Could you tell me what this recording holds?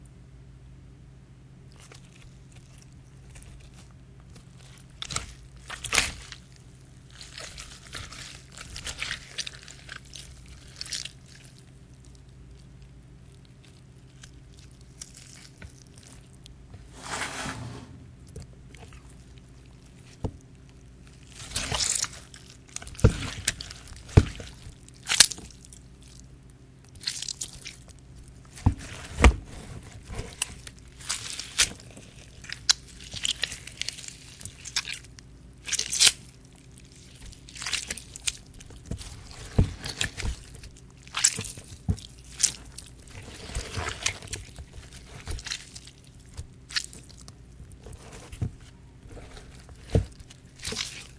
Sharp sounds of gore and flesh gushing. Can be layered underneath a knife stabbing sound to simulate skin and flesh being pierced.